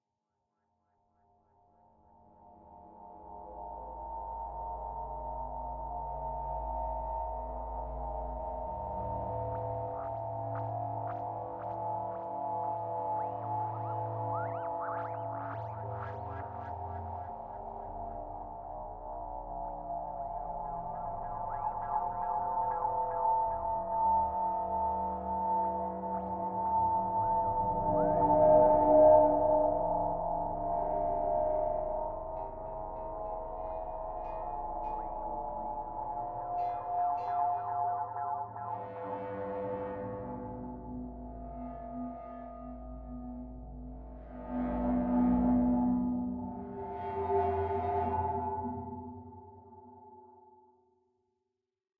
Dronnie Darko
Different drones mix
Ambient, Drone